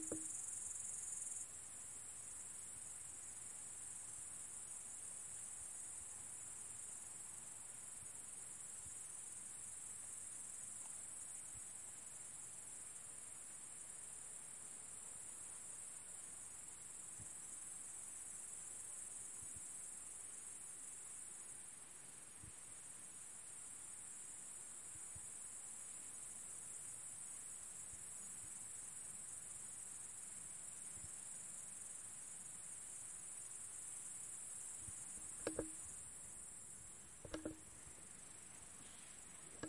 Crickets are chirping in the evening